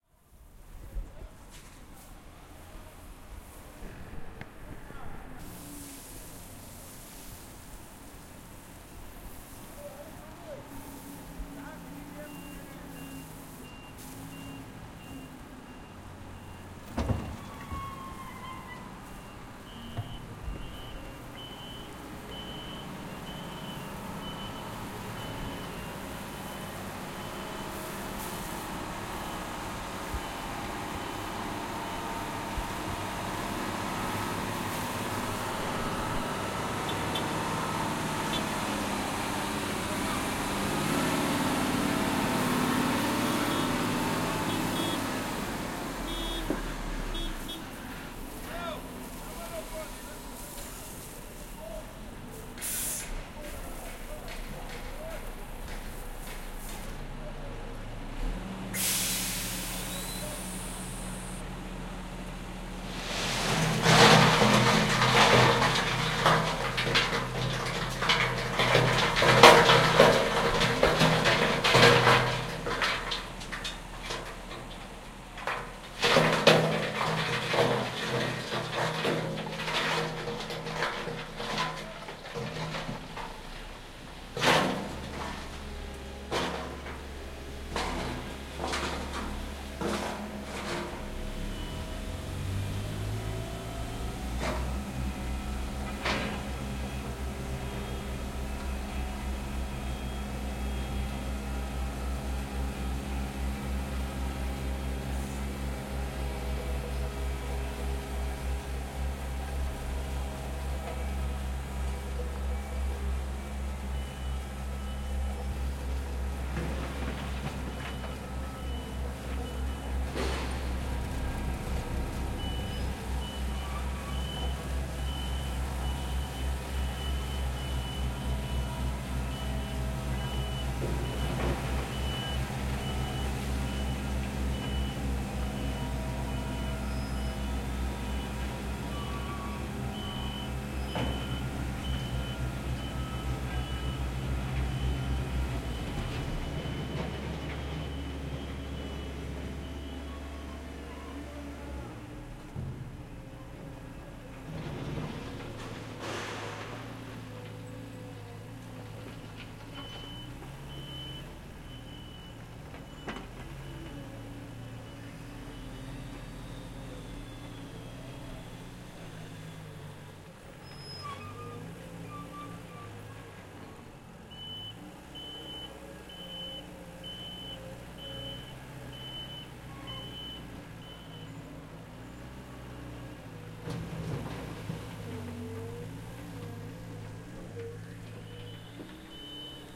Construction Site
air-brakes, ambiance, city, construction-site, field-recording, reversing, Truck, unloading, workers-voices